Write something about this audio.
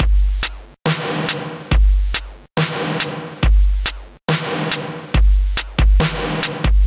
a very loud, dubstep inspired drum loop in 140bpm.